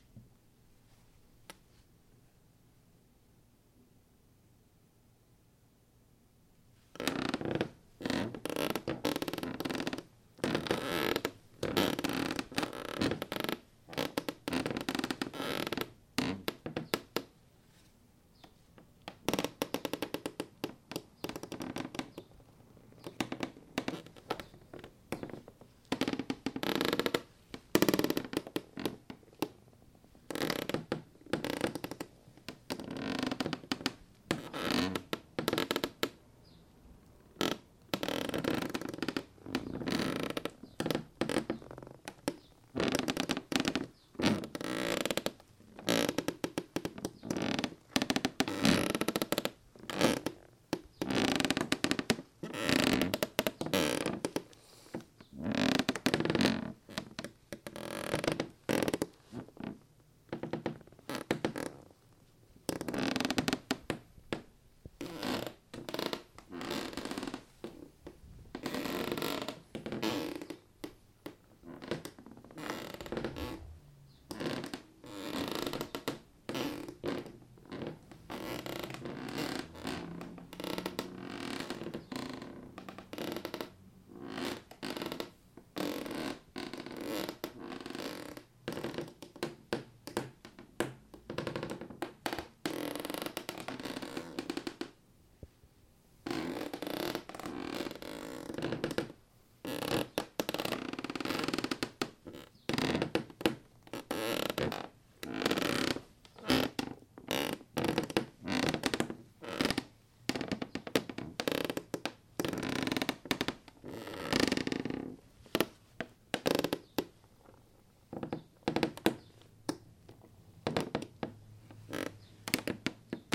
Foley, cracking floors, take-2
Foley of creaking floor boards, take 1.
I'd also love to hear/see what you make with it. Thank you for listening!
foley, creaking, boards, floor